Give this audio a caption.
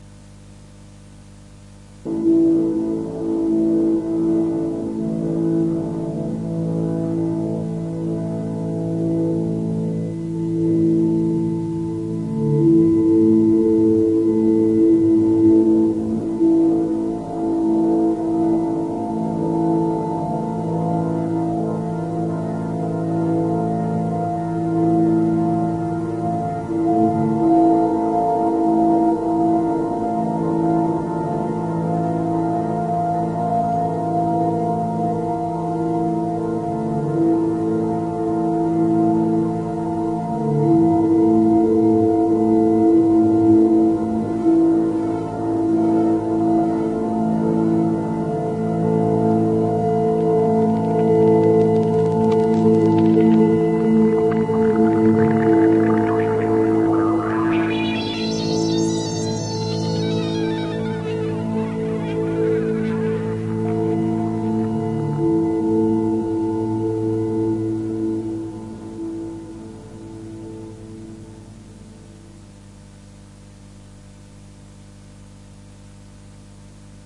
Jeff 01 75 Normalized
I took the following sound created by thatjeffcarter and recorded it to cassette at different volumes.
This sound was recorded from the computer to cassette with the computer sound output volume at ~75% of full volume.
The idea is to present several instances of the same sound recorded at different volumes so that the 'tape saturation' effects can be compared.
These samples are intended more as a scientific experiment than to be used for musical purposes - but of course, they can be used as such.
Sound was played from a Toshiba Satelite laptop usging the built-in soundcard (Realtek HD Audio) using Windows XP sound drivers.
Recording system: LG LX-U561
Medium: Sony UX C90 HCF (Type I normal bias 90 min). The tape was new (i.e., not used before) although it was bought around 2 years ago.
Playing back system: LG LX-U561
digital recording: direct input from the Hi-Fi stereo headphone socket into the mic socket on the laptop soundcard. Using Audacity as the sample recorder / editor.
cassette, saturation, volume, collab-2, Sony, tape